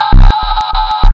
recording of a handheld game tronic device. i connected the device directly from the plate to the audio in of my computer. so the sound is kind of original. this sample gets an deep saw-bass insert, cause of the low batteries.
bass, game, game-device, handheld, haribo, raw, saw, synthetic, tronic